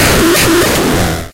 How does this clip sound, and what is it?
DR Ruiner Snare 8
drum, one-hit, bent, snare, a, circuit, machine, roland, dr-550